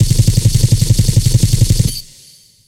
Silenced Submachine gun
A firing sound I recorded of a standard issue submachine gun with a silencer attached. Will be higher quality once downloaded
Recorded with Sony HDR-PJ260V then edited with Audacity